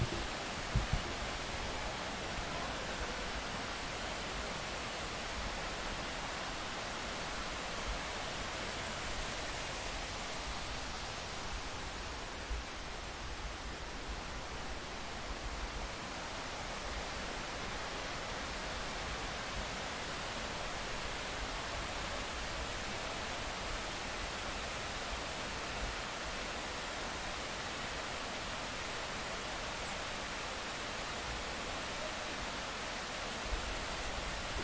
Quebrada Las Delicias - Bosque cercano
Grabación del ambiente en lo alto de la quebrada dentro de un bosque. Bogotá-Colombia
Quebrada Las Delicias a las 09:44 a.m.
Field recording from de top of of the river in a forest. Bogotá - Colombia
River Las Delicias at 09:44 a.m
agua, bogota, bosque, colombia, falling, field-recording, forest, nature, paisajesonoro, quebrada, river, water